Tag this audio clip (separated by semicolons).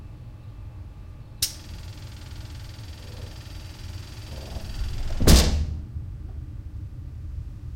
close; door; pneumatic; train